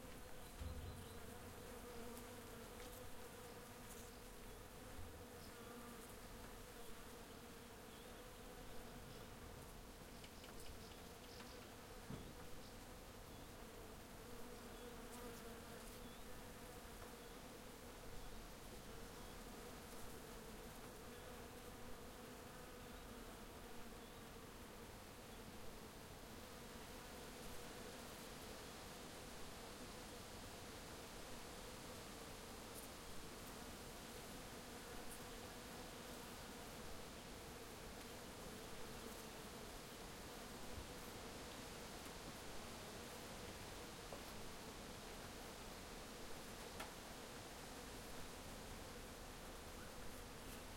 Sound of bees flying and buzzing in the apple trees.